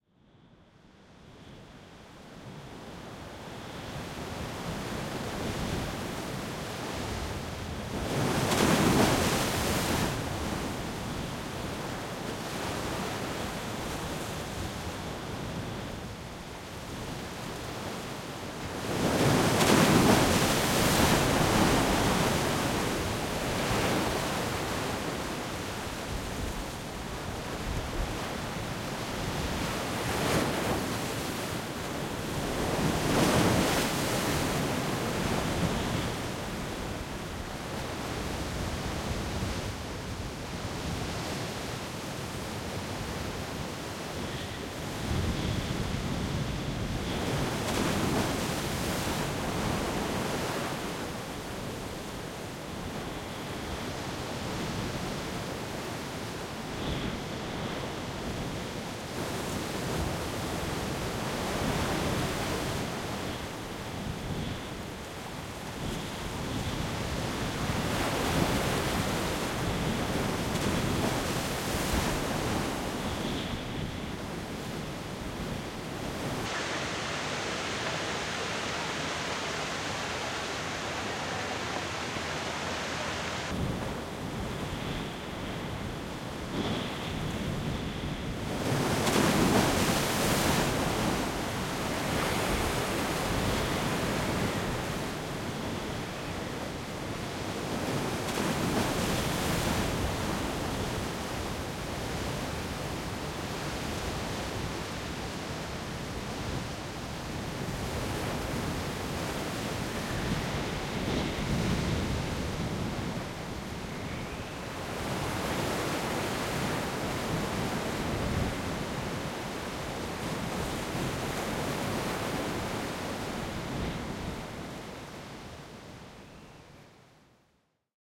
Storm on the coast. Wind and big waves.
Ouessant, Atlantic ocean, France 2020.
Recorded with Schoeps MS
Recorded on Sounddevice 633
4 different takes edited and mixed together